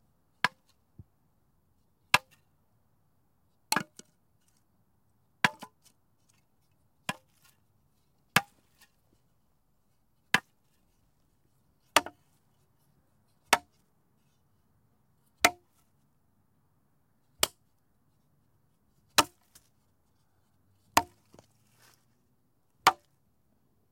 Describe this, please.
Sticks Hitting sticks
sticks hitting each other for dull wood sounds
smack, crack, hit, Sticks, together, stick, wood